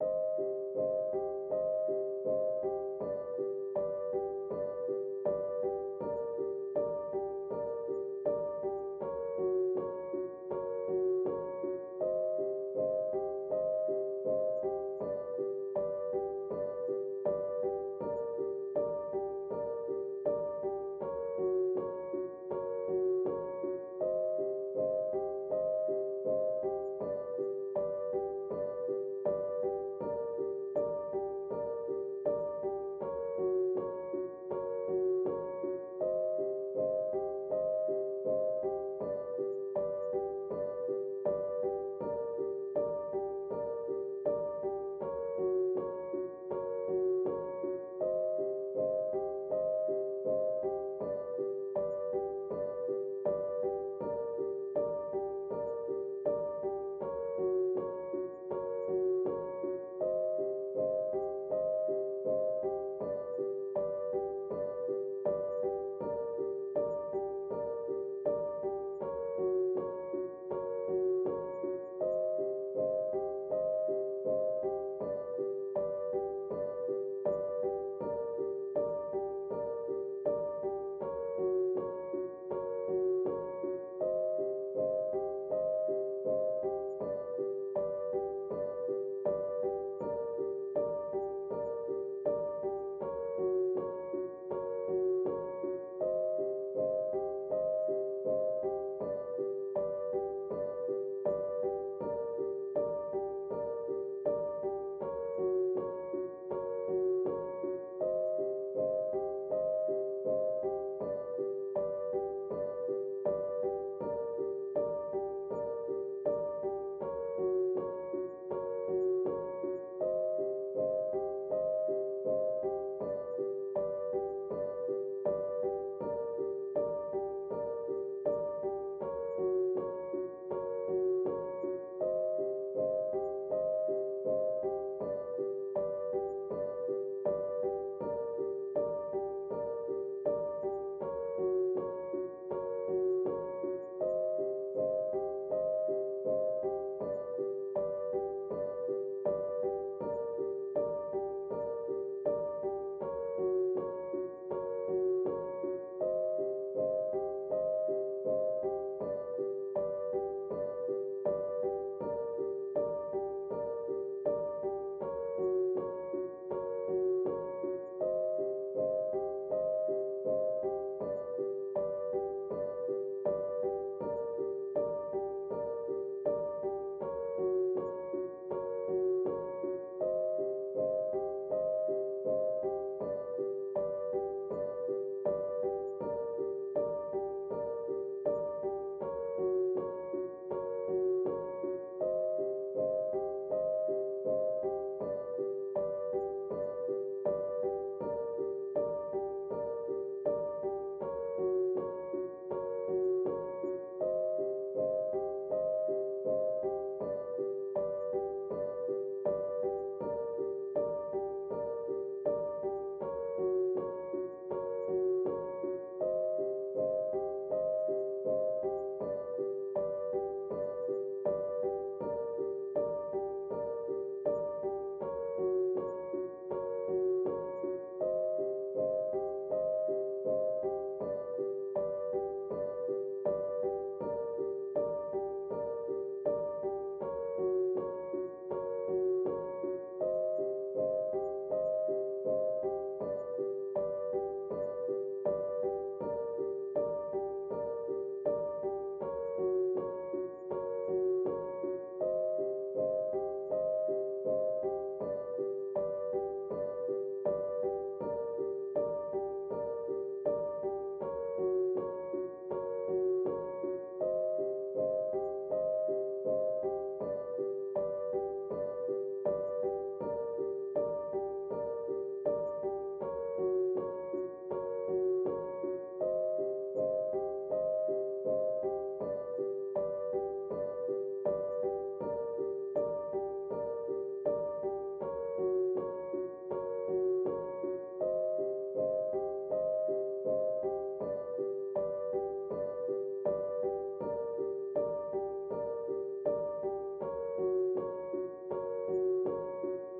Dark loops 006 piano 80 bpm
piano, loops, loop, 80bpm, bass, dark, bpm, 80